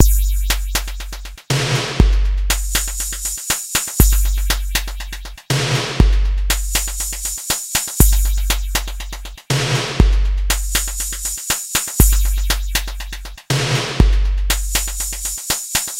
A TR-808 beat at 120 BPM. Enjoy!